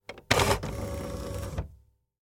typewriter electric return
Sound of the return key of an electric typewriter.
Recorded with the Zoom H4n and the Rode NTG-3.
electric, return, button, key, typewriter